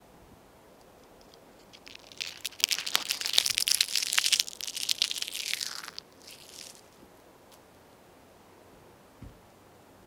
Short clip of crushing of a dead leaf.
[Zoom H1n]